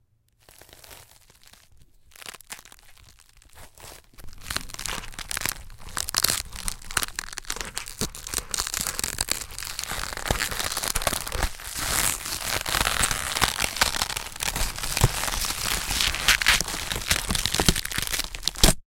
Sound of ripping flesh, made from squishing cabbage and melon.